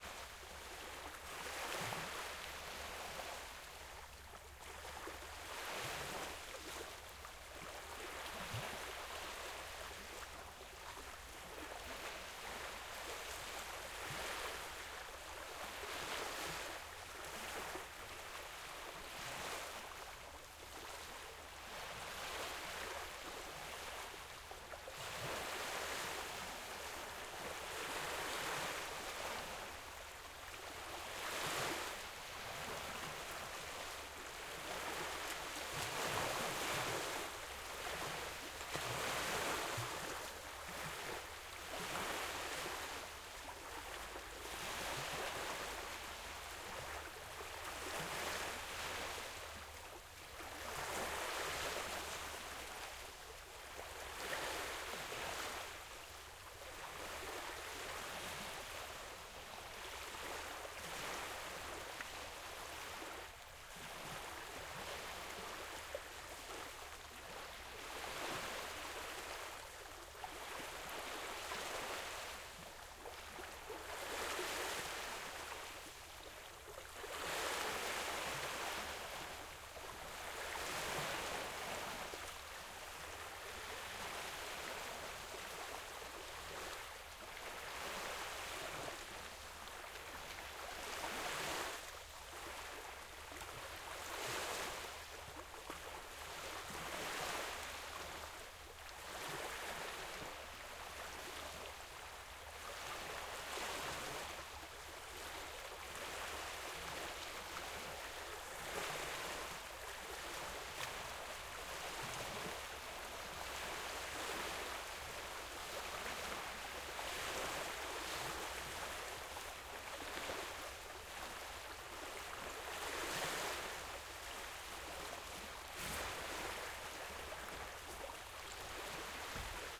BGSaSc Beach Light Waves Water Greece 13
Beach Light Waves Water Greece 13
Recorded with Km 84 XY to Zoom H6
Ocean, Waves, Greece, Light, Beach, Water, Weather